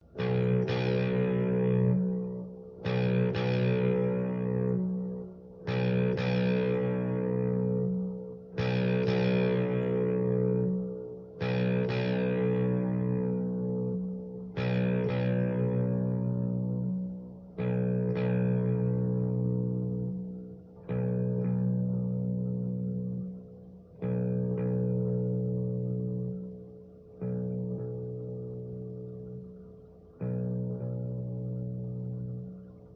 I am creator of this piece. Me playing on my Gibson Les Paul electric guitar. I made this so it can be looped and played repeatedly.